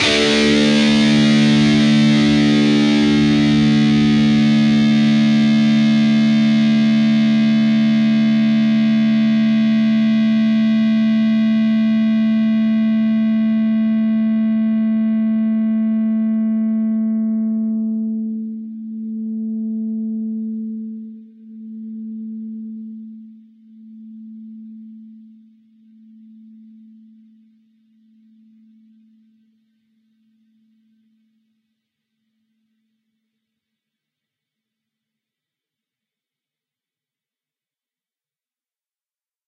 Dist Chr Arock 12th
Fretted 12th fret on the A (5th) string and the 14th fret on the D (4th) string. Down strum.